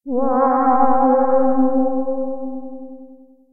Medium pitched "alien moan," FM synth with sweeping vocal formant filtering, vibrato at end. (MIDI 60)
horror, alien, formant, sound-effect, instrument, synth, sci-fi